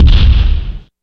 A pounding noise. From the creator of "Gears Of Destruction".
crash, industrial, machine, mechanical, pounding, smash